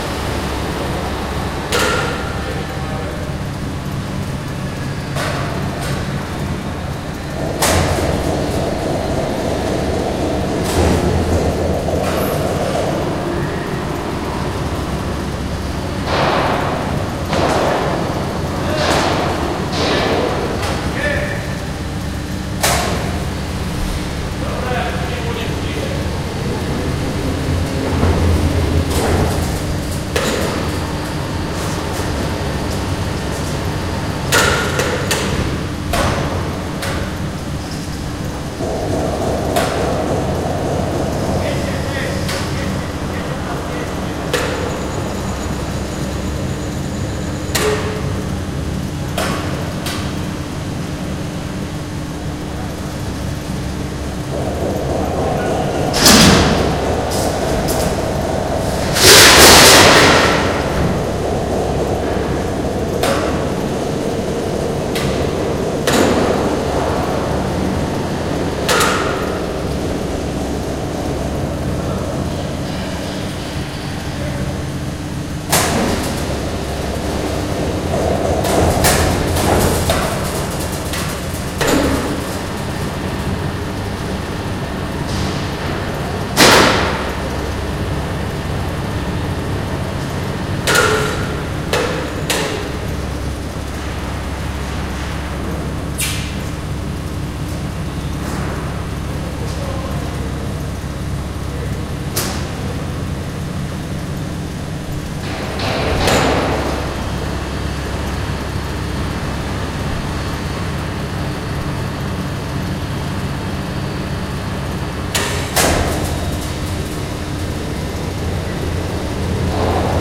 Two minutes of industrial sounds recorded in factory.
Two hours more of this recording available on request.